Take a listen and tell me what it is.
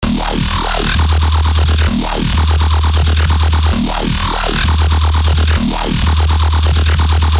becop bass 3
Part of my becope track, small parts, unused parts, edited and unedited parts.
A bassline made in fl studio and serum.
Long and sloping grind with short popping 1/16th bass
loops, wobble, bass, low, dubstep, sub, electronic, Xin, fl-Studio, techno, electro, grind, Djzin, synth, loop